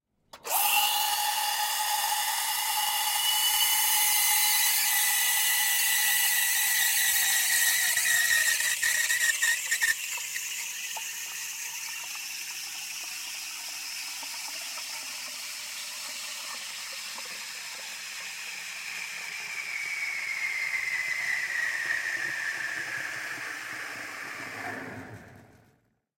Steamer in milk
Steaming milk for flat white.
Microphone: Zoom H4N Pro in XY 90° set-up.
coffeeshop, flatwhite, jug, milk